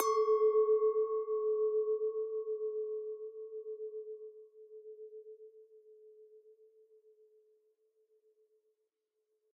Just listen to the beautiful pure sounds of those glasses :3